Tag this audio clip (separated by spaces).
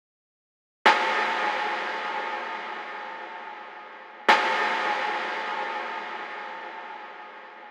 drums one-shot snare